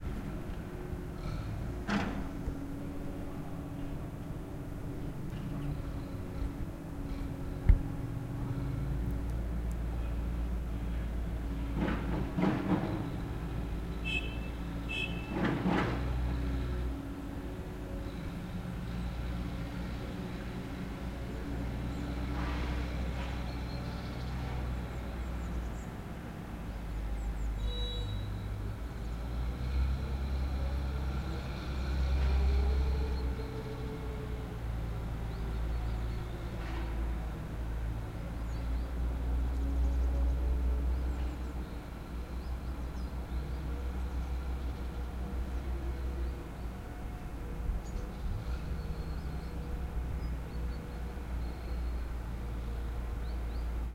Construction site where a street is beeing fixed
building, building-site, construction-site